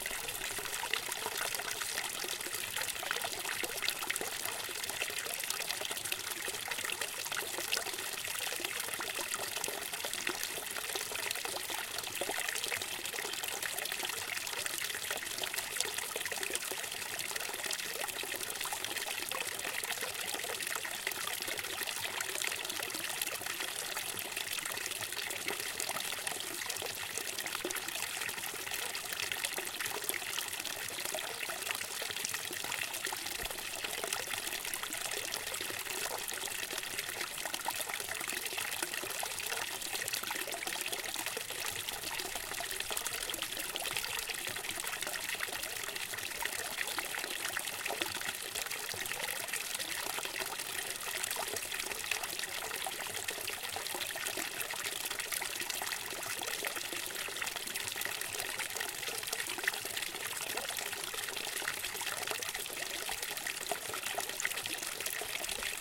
111026-001 fountain sankenbach valley
Water streaming out of a small fountain and falling into a basin. Sankenbach valley near Baiersbronn, Black Forest region, Germany. Zoom H4n.